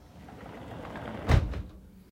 Sliding door with a thud sound effect I made for a video game I developed.
close, drag, pull, pulling, push, pushing, scraping, Sliding-door, thud